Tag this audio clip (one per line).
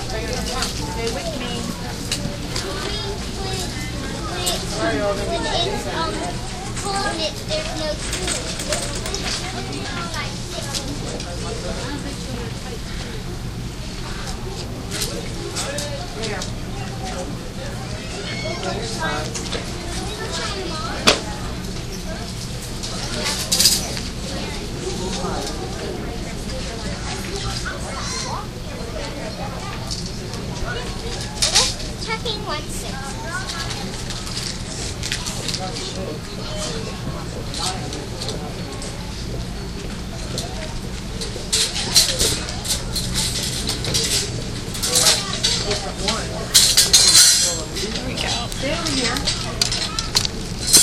field-recording
interior
crowd
shopping
christmas
store